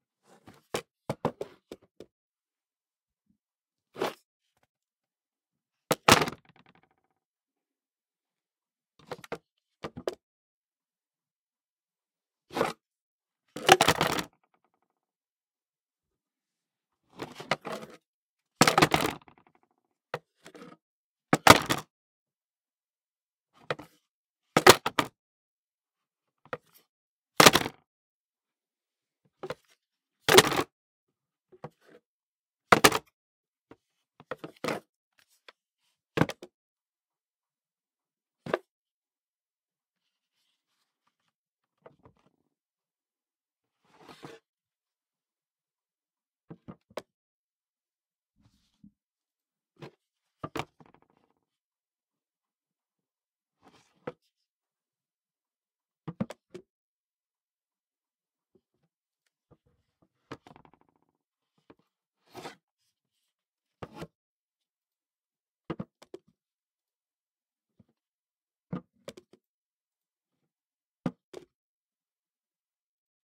handling picture frame
Grabbing a picture frame off a table. Throwing it down. Multiple times for variety.
frame, handling, picture